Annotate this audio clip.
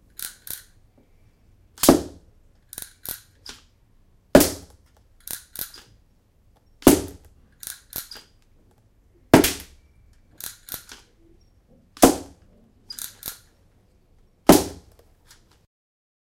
Recharge carabine
Recharging a riffle. Sound made with bike ring.
bike, gun, recharge, Riffle, ring